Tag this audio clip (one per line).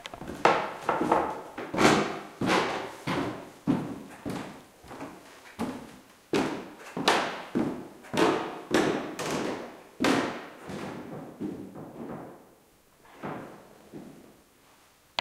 stairs
wood
steps
creaking
staircase
stair
footsteps
wooden